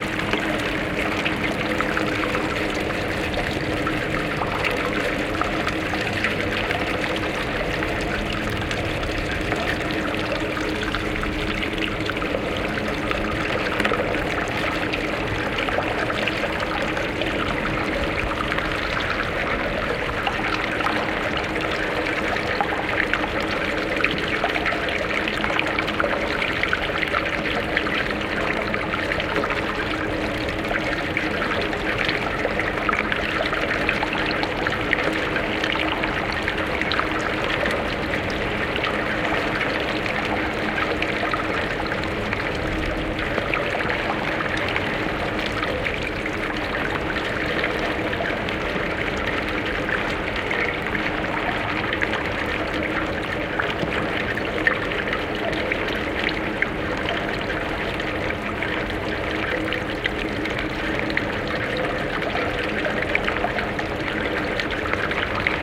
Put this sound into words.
Water Through Drain (With Reverb)
Water flowing through the drainpipe coming down from my gutters. Could work nicely for an underground/sewer/cave ambience. Edited to remove bumps on the mics, and EQ'd to enhance the resonance of the pipe and remove rumble. Reverb added for effect- see the other version of this sound in my "Rainy Day" pack to hear it without reverb added.
Recorded in Carson, CA. Used a Zoom H6 with XY attachment, with proper protection against water (I wrapped my field recorder in plastic and sealed with duct tape, then placed the windscreen over top of that to dampen any impact from stray drops). Recorded about an inch from the end of the drain.
Bubbles, Cave, Cavern, Drain, Dripping, Drips, Drops, Echo, Field-Recording, Flow, Gurgle, Gush, Gutter, Pipe, Resonant, Reverb, Sewer, Stereo, Water